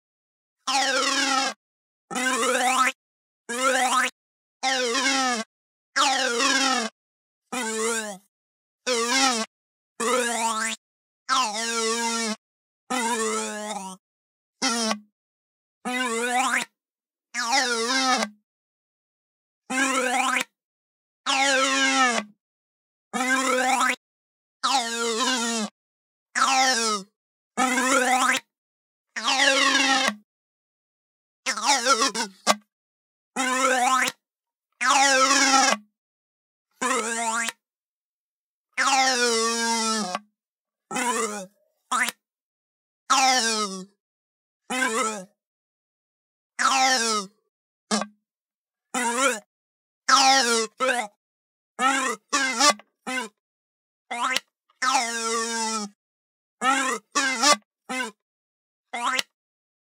Groan Toy Long
I recorded my favorite odd groan toy. These are the long slides, some parts have the slap of the whistle hitting the end of the tube. Recorded with my Sennheiser 416 on a Tascam DR-680.
416, dr-680, field, groan, long, recording, sennheiser, short, slap, slide, tascam, toy